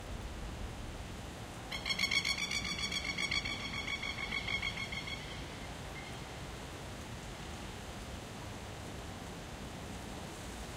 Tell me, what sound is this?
Recording of some plovers screeching as they fly over late at night, with distant cars in the background.
Recorded in Brisbane, Australia using a BP4025 microphone and ZOOM F6 floating-point recorder.